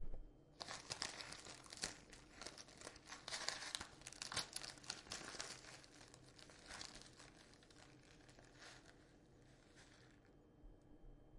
7. Tea bag
Sound of a tea in a plastic bag
bag, plastic, wrapping, wrap, tea